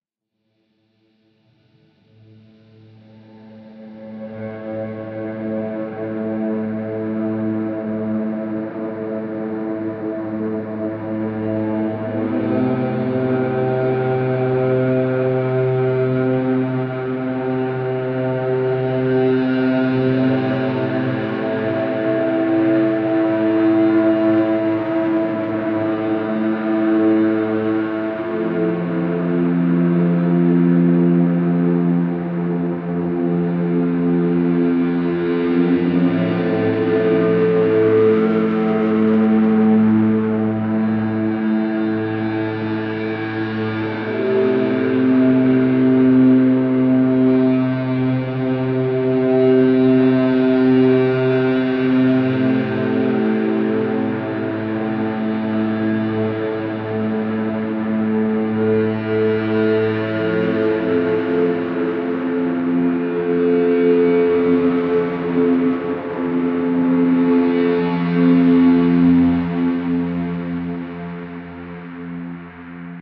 Guitar played with a violin bow. Recorded onto Logic Pro with a Focusrite Scarlett 2in2 interface.